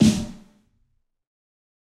Fat Snare EASY 029
This is The Fat Snare of God expanded, improved, and played with rubber sticks. there are more softer hits, for a better feeling at fills.
drum, fat, god, kit, realistic, rubber, snare, sticks